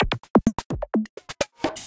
syndrum loop 128 bpm
this is a small loop made with the samples from that pack its at 128 bpm